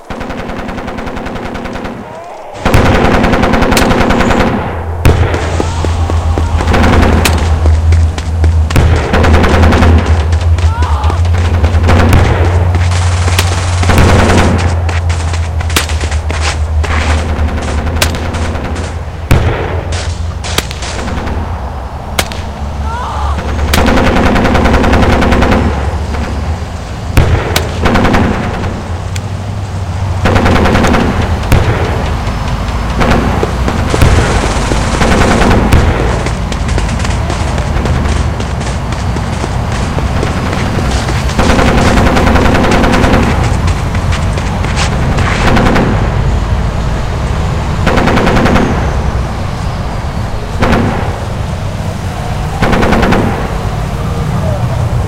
World War 1 Trench warfare battlefield sounds
attack, war